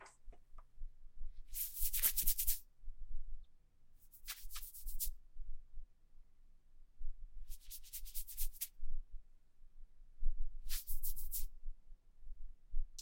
Hair Tousle
hair, head, scratch, scratches, scratching, tousle